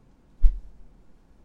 Quick movement sound. Fitting for hits, jumps or scene cuts.